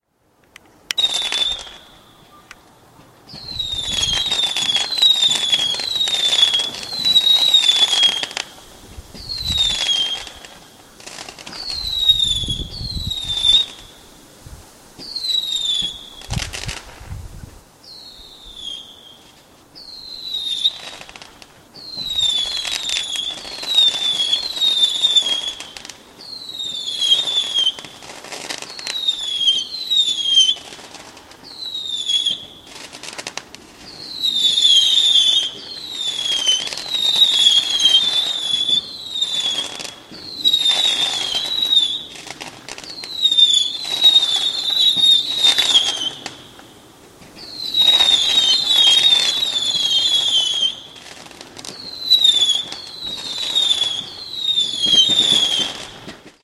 Fireworks, Crackle and Whistle, A
Raw audio of whistling fireworks that often leave a crackling sound.
An example of how you might credit is by putting this in the description/credits:
boom, explosion, firework, bang, whistle, standard, fireworks, crackle, rocket